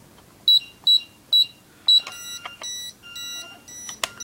Setting a house's security alarm. The key is entered and then the beeps right at the end is the 'exit tone' asking you to leave before the alarm goes off.